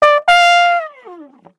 EQ-Tru126 Trumpet
roots, DuB, HiM, reggae